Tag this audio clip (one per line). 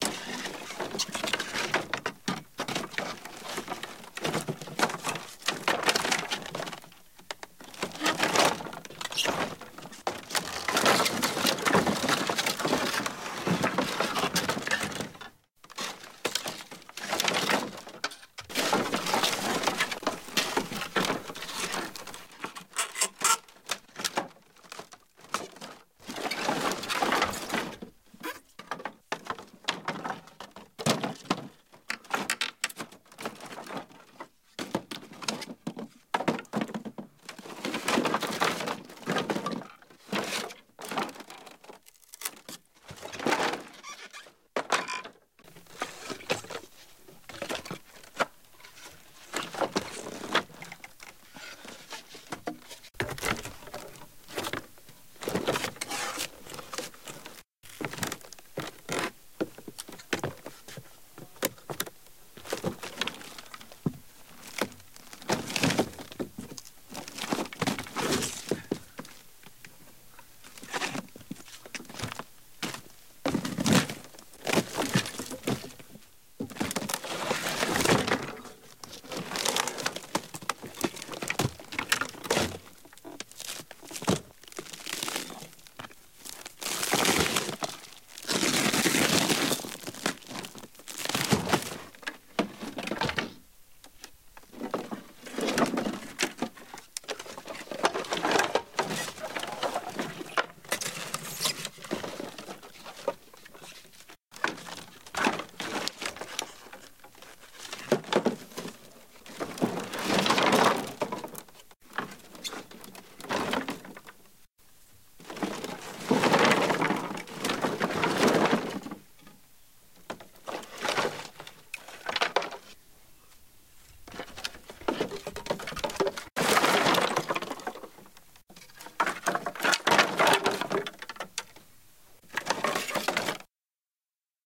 foley
handling
Wood